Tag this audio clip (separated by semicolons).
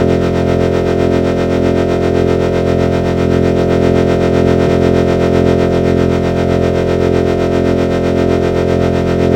sci-fi; vehicle